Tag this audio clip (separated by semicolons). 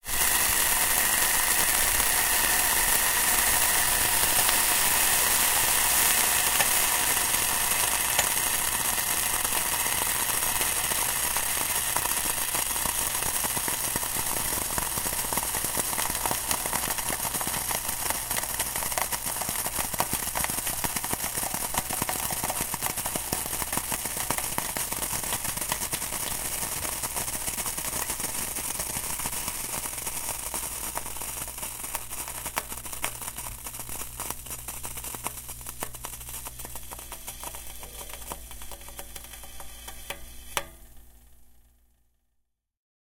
Water; sizzle; heat; steam; hiss